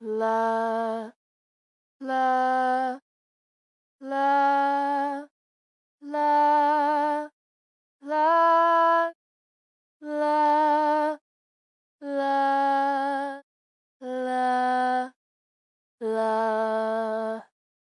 Singing Scale - A Major
Female voice singing A Major scale. Each note runs for 2 bars set to 120bpm. Enjoy!
acapella, amajor, female, scale, singing, vocal, vocals, vocal-sample, voice, vox